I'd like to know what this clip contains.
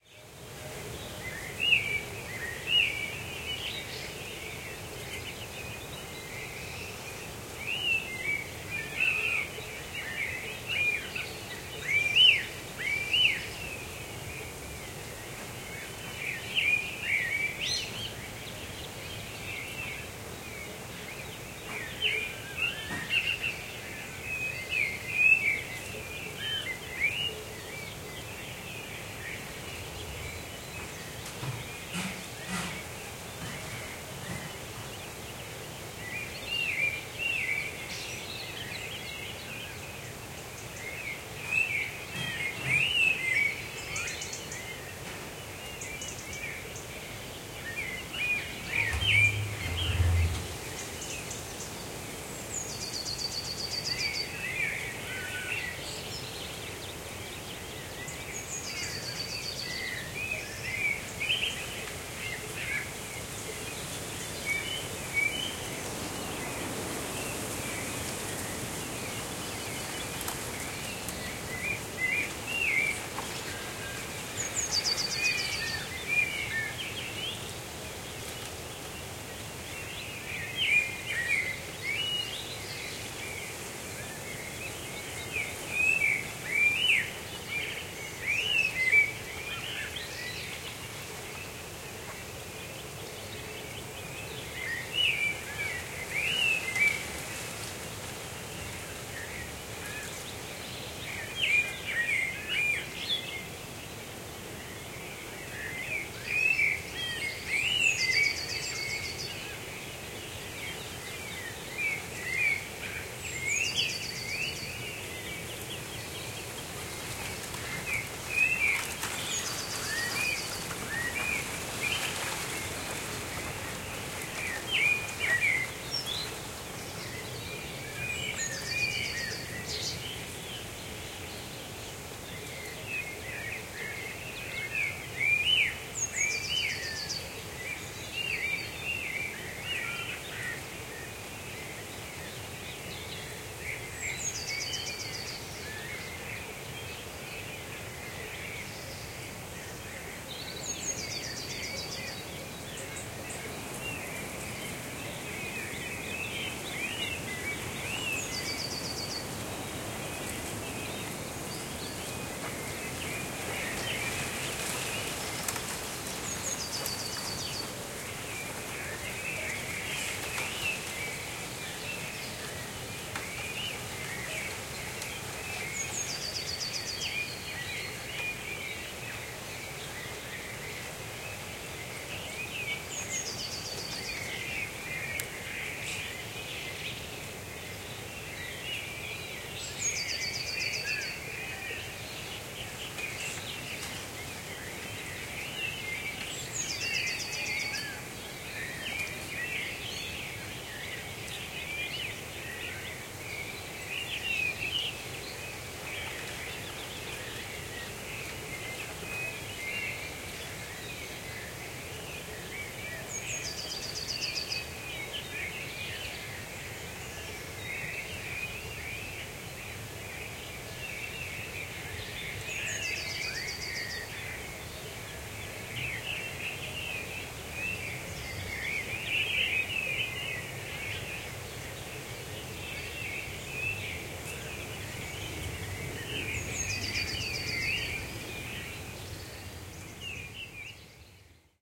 20190302.morning.chorus
Early morning chorus in a rural area, with birds singing (mostly Balckbird), distant sheep bells, dog barkings, and some noise coming from a nearby house. EM172 Matched Stereo Pair (Clippy XLR, by FEL Communications Ltd) into Sound Devices Mixpre-3 with autolimiters off. Recorded near Aceña de la Borrega, Extremadura (Spain)
ambiance, birds, blackbird, country, countryside, farm, field-recording, forest, nature, rural, spring